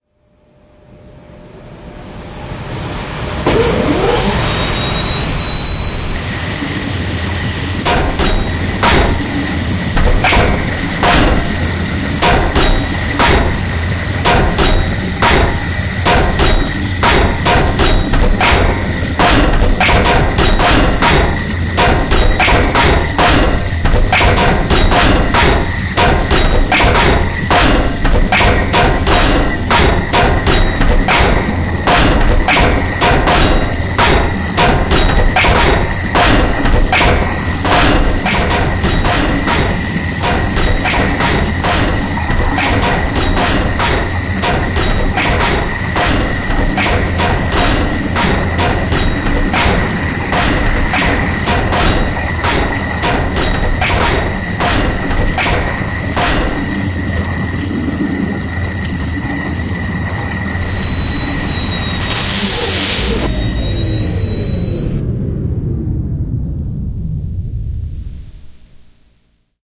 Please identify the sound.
A large trash compacting machine I designed with samples from PhreaKsAccount, chipfork, incarnadine, FreqMan, dobroide, and themfish